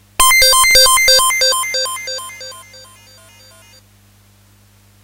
comet high C
highest (C) on the comet program from Yamaha pss170
80s, comet, fx, portasound, pss170, retro, yamaha